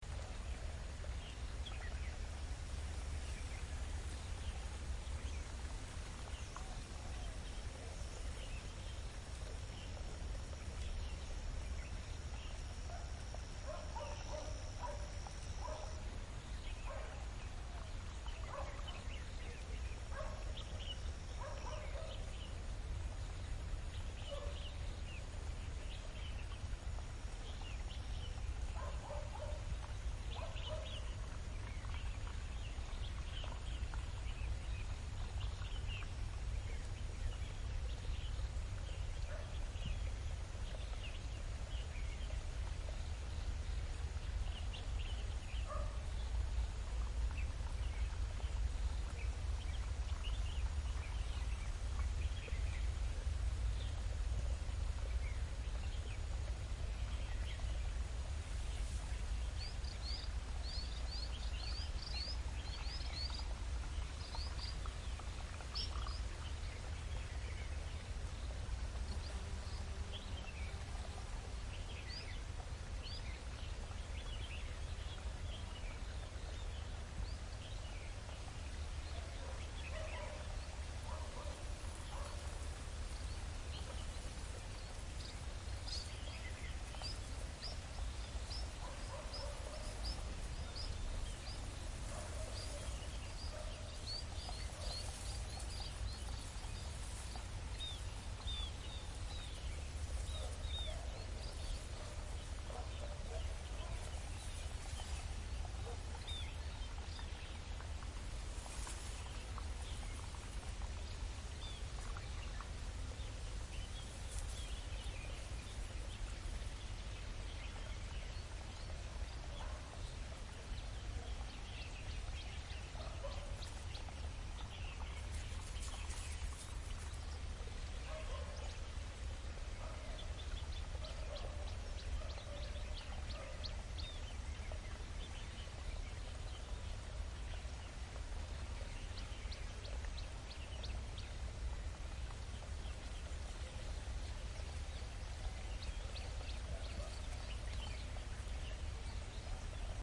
Bird 3+Stream
Stereo recording in a farm on iPhone SE with Zoom iQ5 and HandyRec. App.
ambience, farm, field-recording, hong-kong, nature